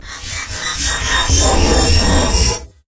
Horror SFX 1
Useful for I think a monster or something like your stamina running out I don't know knock yourself out with it.
Recorded with an INSIGNIA Microphone by putting a dog toys squeaker into the microphone and editing it with Audacity